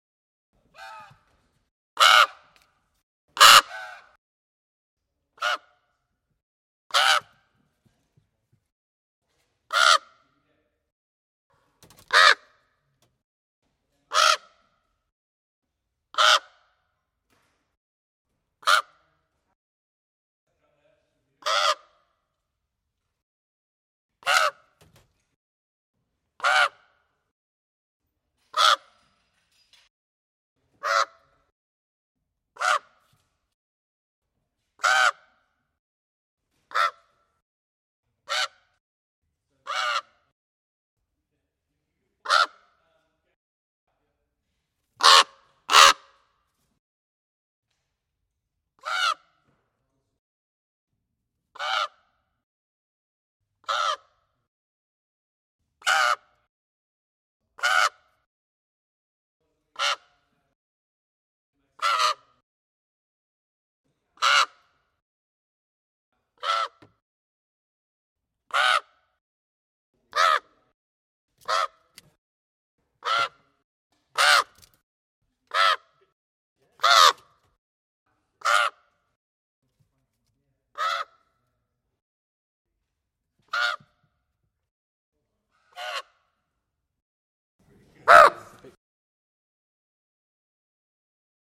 Raven Caw
A raven close mic'd using an sE Electronics large diaphragm condenser into a Zoom H6n. Lots of takes and variations of the raven's cawing.
bird, birds, birdsong, caw, crow, nature, raven